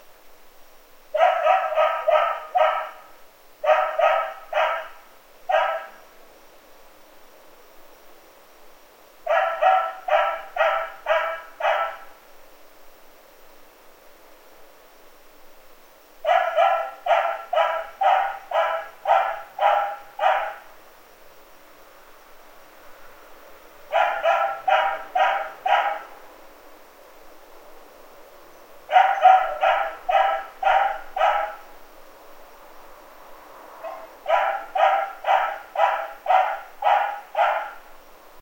dog barking1
bark, barking, dog, dogs, pet